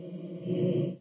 a sound for transition